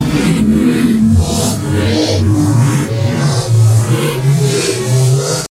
the siths
pattern, electronic, distortion, loop